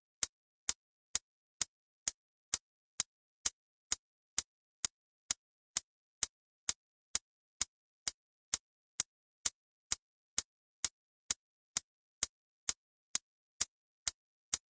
hh gift2
hi hat loop